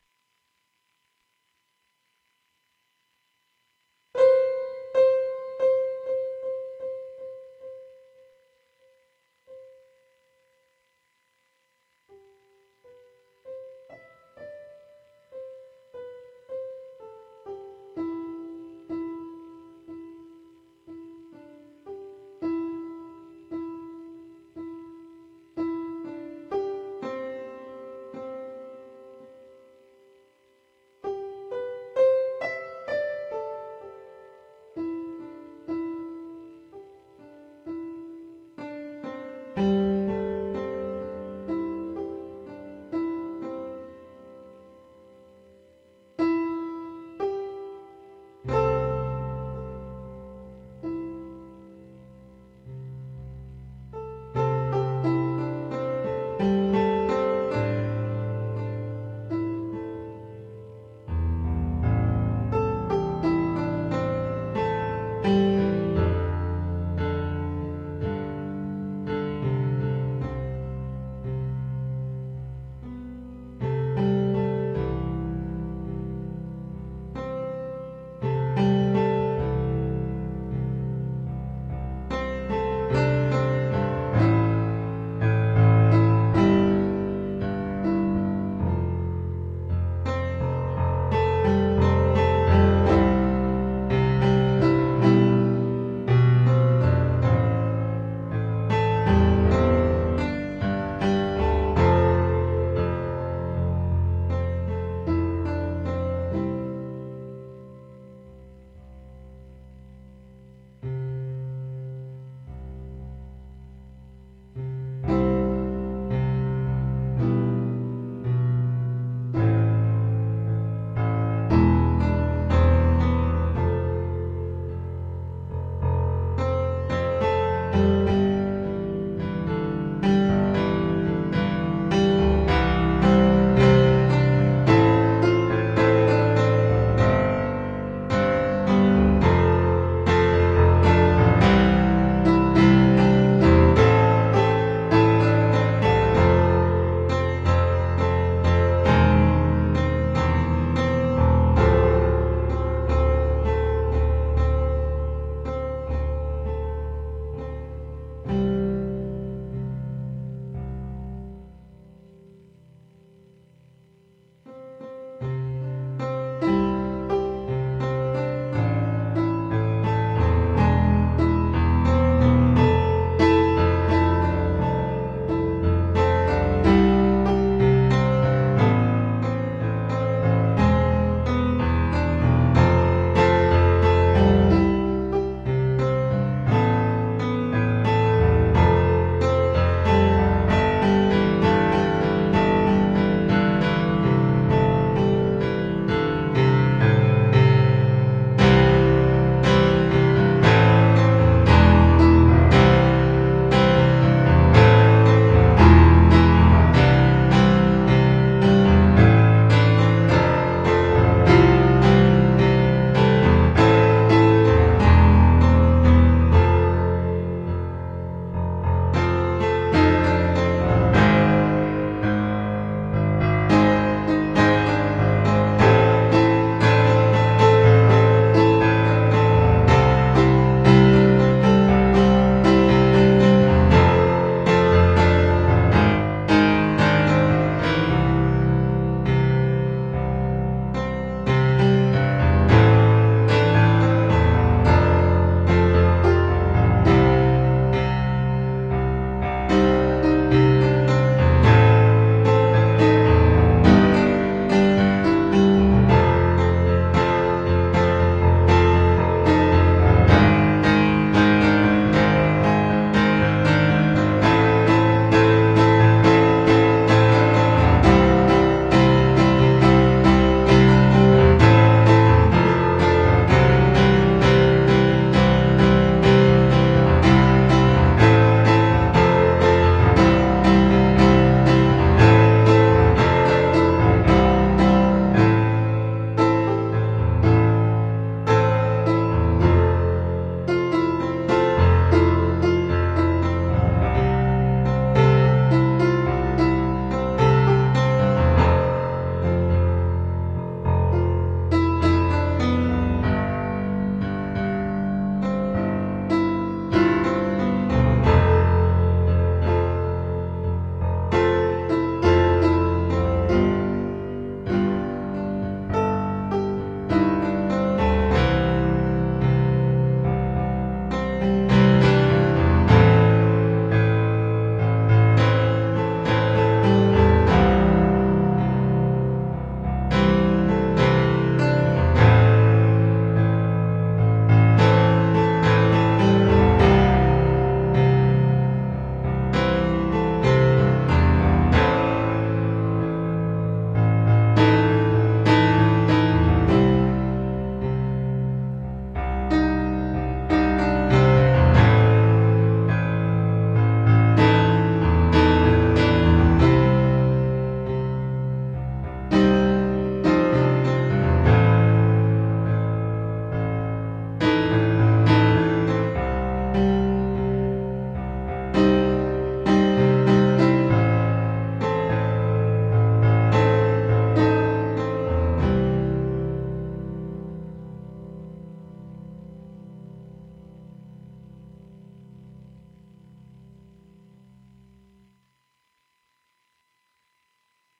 piano improv 1 2 2011
Recorded using an Alesis QS8 keyboard using a direct signal.
This sound file is unedited so you will most likely hear mistakes or musical nonsense. This sound file is not a performance but rather a practice session that have been recorded for later listening and reference.
Thank you for listening.